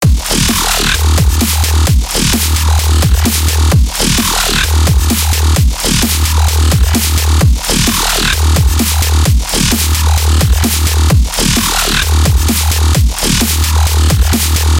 Talking bassline Becope 1
Short clip of a grinding, talking , metallic bassline.
Can upload a version without drums or a longer sample, or a bleeding sample for easier sampling.
Made with Fl and Serum.
grind, talk, bass, djzin, dubstep, xin, bass-line, djxin, metalic, electronic, low, brostep, bassline, techo